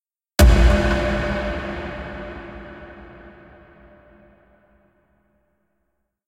(Warning! Loud) sound made using lmms and audacity.